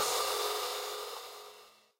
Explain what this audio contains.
A cymbal created using mic-noise. Layered with a previous hat sound, which was also created using mic-noise.